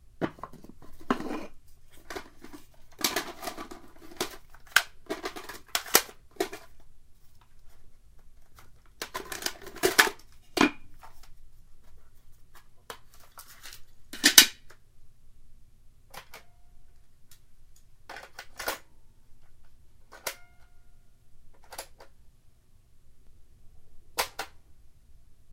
I opened a box, went through the cassette tapes, took one out, took the tape out of the plastic box, inserted it in the cassette player, pressed play, then pressed stop. Mono recording.
play, tapes, cassette, stop, foley, mono, player, tape